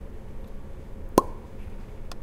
Popping my mouth with my finger. Recorded with a TASCAM DR-05 Linear PCM Recorder.
lips, plop, pops